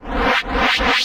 This is a reversed washing type sound to use in broadcast production and jingles
blip, broadcast, jingles, production